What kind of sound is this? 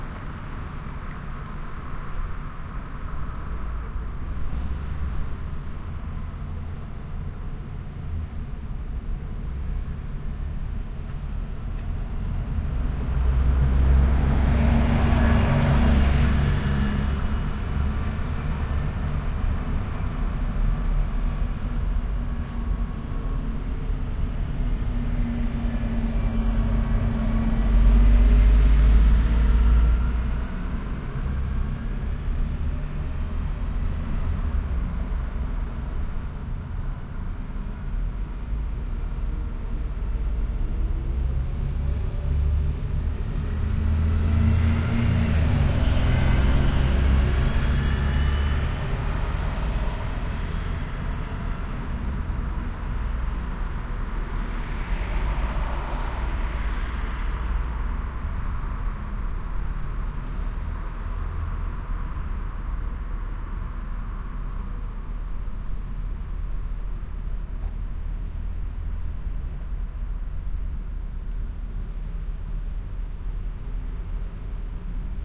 three departures at bus station
I'm sitting across the street from the bus station, where all the buses have their central parking place. In a matter of a minute or so, three buses departure. Sony HI-MD walkman MZ-NH1 minidisc recorder and two Shure WL183